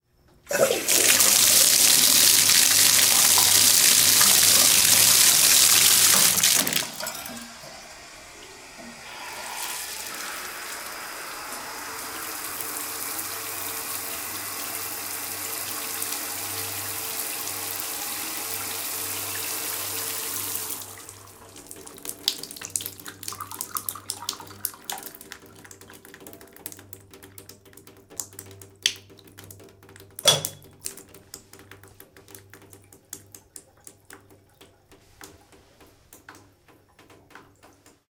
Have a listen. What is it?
Me running my shower faucet in my bathroom.